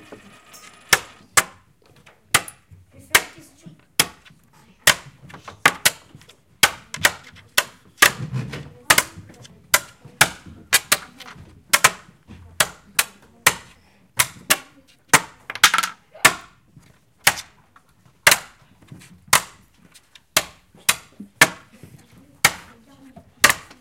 OM-FR-magnets
Ecole Olivier Métra, Paris. Field recordings made within the school grounds. Magnets are attracted to a metal board.
France, Paris, recordings, school